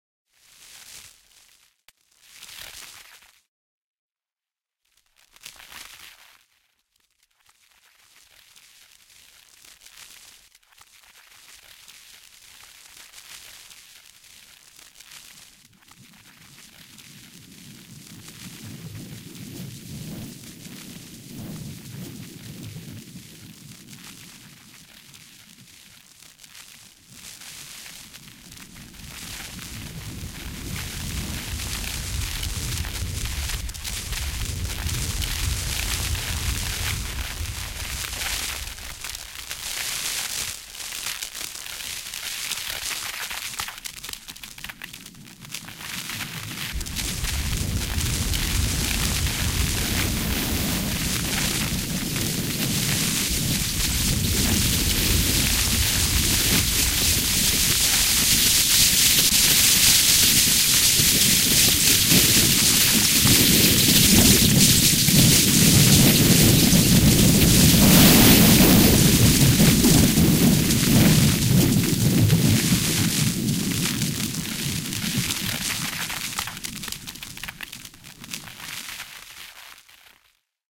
a sound design for fire, flaring up, burning flame, conflagration. some of the sound material was processed with a set of bandpass filters controlled by LFOs and then modulated with filtered white noise and some chaotic noise functions. other sound material from the recordings was processed with several waveshaping abstractions in PD and modulated with filtered feedback.
competition, field-recording, fire, noise, processed